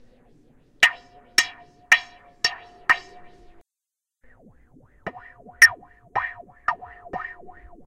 Treard Justine 2014-2015 SON-ENREGISTRER-3
Tracks> Add new> stereo tracks
Paste the third sound interesting
Insert a blank space 0.2 second between the two sounds.
Select the first sound
Effect> wahwah> LFO Frequency 1.5, dep LFO Phase 0 , Depth 70% , Amplify 10, and
30 shift wah.
Select the second sound
Effect> wahwah> LFO Frequency 1.5, dep LFO Phase 0 , Depth 60% , Amplify 10, and
0 shift wah.
spring, metal, echo